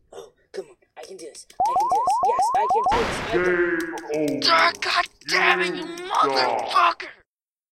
pissed off gamer
Gamer plays really old game.
Tones (chirps) generated with Audacity. Voice and explosion recorded with CA desktop mic.
TRANSCRIPT:
GAMER: Oh, come on (pressing keys)
GAME: (various shooting noises)
GAMER: I can do this, I can do this! Yes! I can
GAME: (explosion)
GAMER: do this! I ca--
GAME: GAME OVER.
GAMER: Oh god damn it, you motherf--ker!
GAME: YOU SUCK.
wait, how can old retro video games have voice acting?
anger, angry, explicit, funny, game, gamer, lets-play, mad, male, old, pissed-off, pissed-off-gamer, play, playing, rage, retro, silly, stupid, swear, swearing, swears, voice, yell, yelling